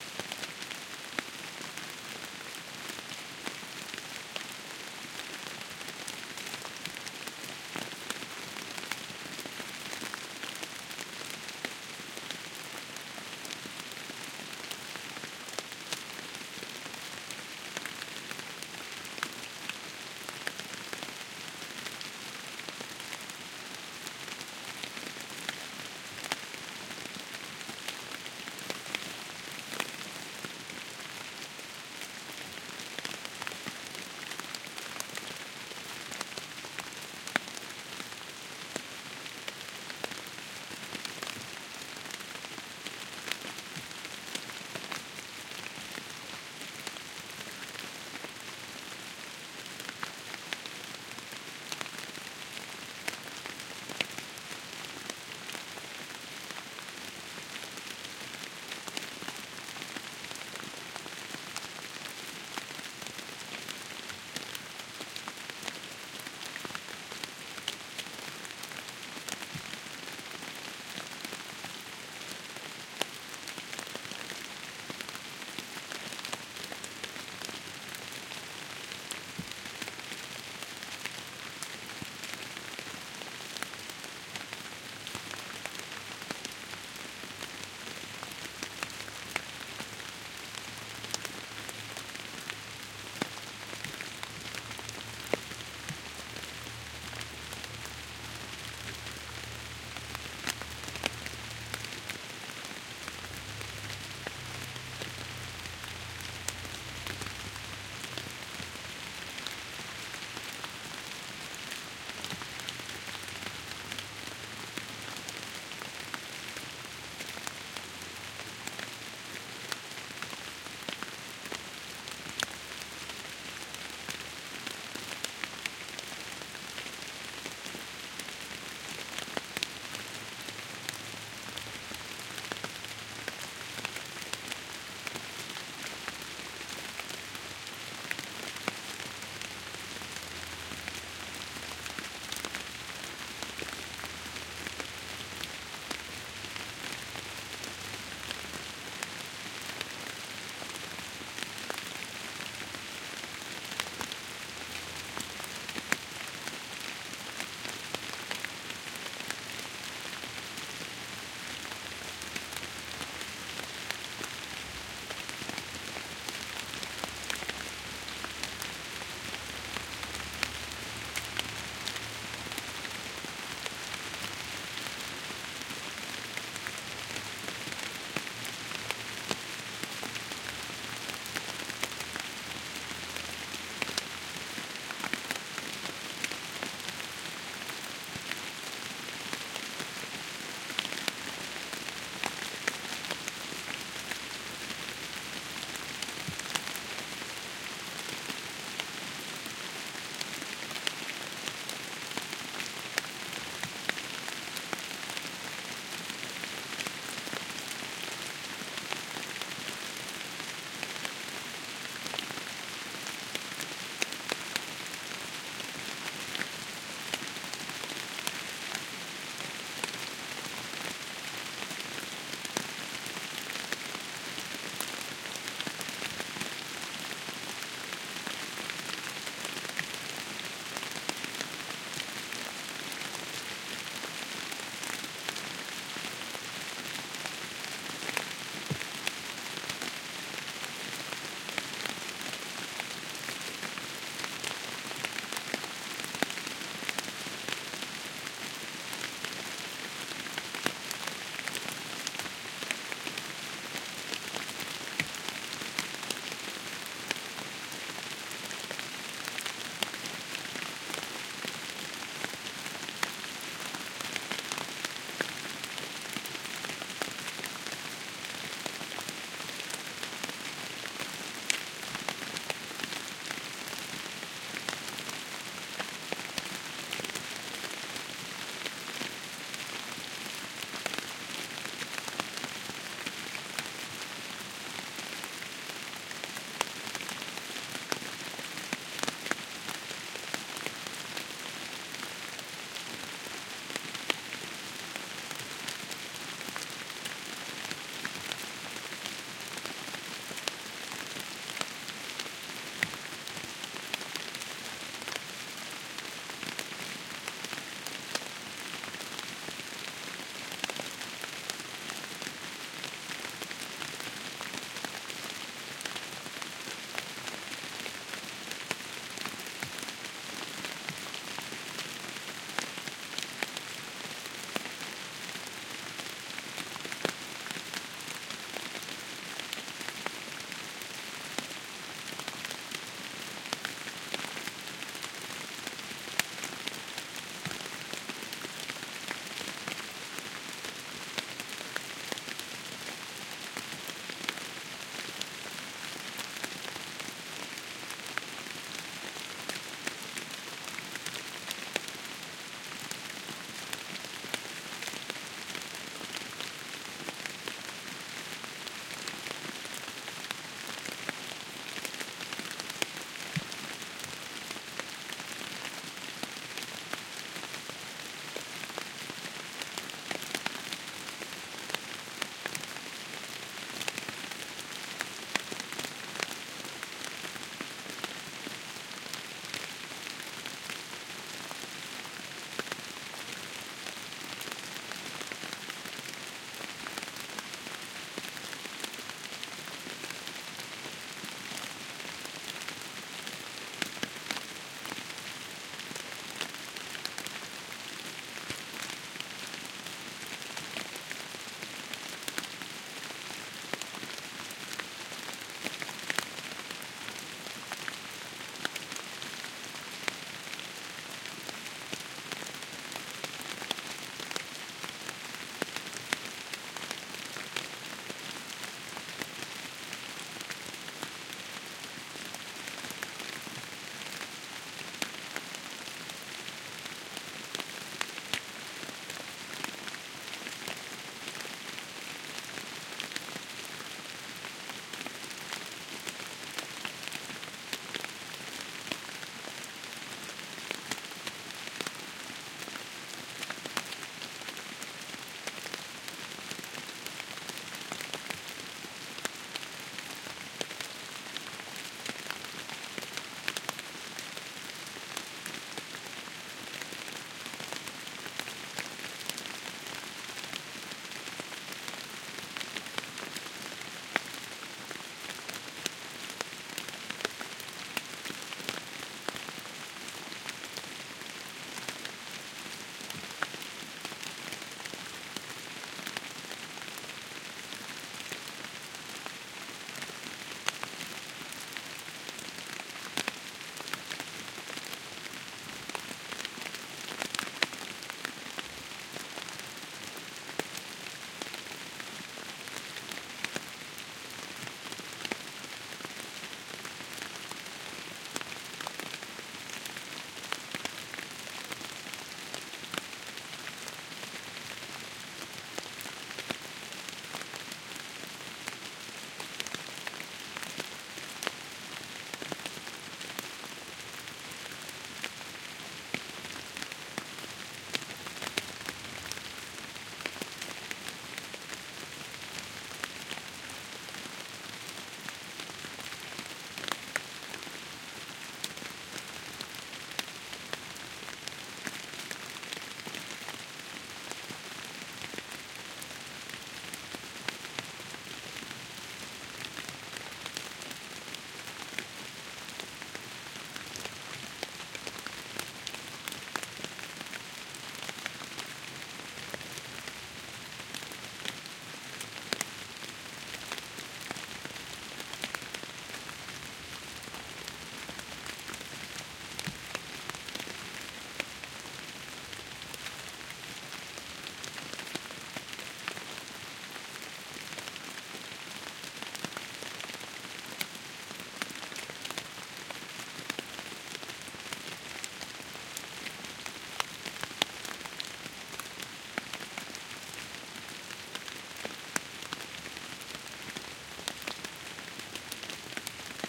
light forest rain
A quiet rainfall on leaves in the middle of a quiet forest. Loops well so you can listen forever.
Recorded with AT4021 mics into a modified Marantz PMD661 and edited (remove some rain hitting mics) with Reason.
nature, phonography, field-recording, ambient, atmosphere, outside, forest, water, quiet, soundscape, rain, washington, relaxing, gifford-pinchot-national-forest